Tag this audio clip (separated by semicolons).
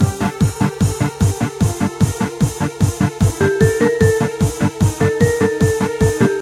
gamedev; indiedev; videogames; gaming; videogame